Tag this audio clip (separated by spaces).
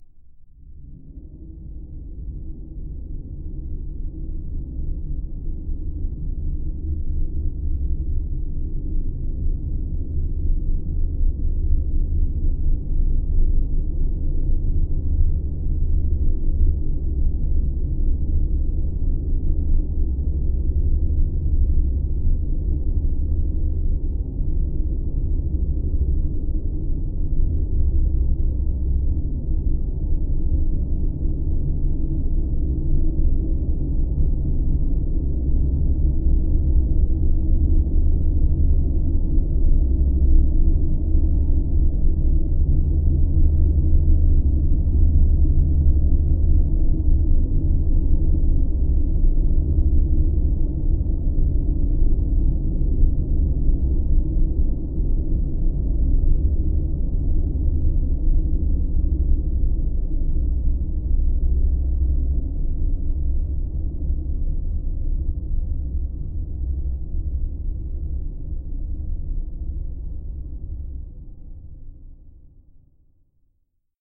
background drone industrial multisample soundscape